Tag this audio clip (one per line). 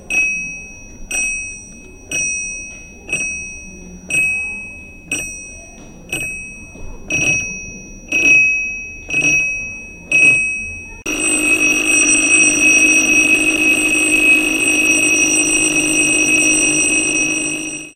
despertador
efectos
reloj
sonoros